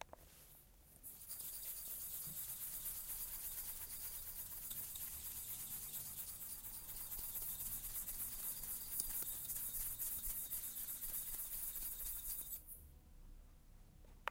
mySound Regenboog Chahine
Sounds from objects that are beloved to the participant pupils at the Regenboog school in Sint-Jans-Molenbeek, Brussels, Belgium. The source of the sounds has to be guessed
Sint, Brussels, Regenboog, Molenbeek, Belgium, mySound, Jans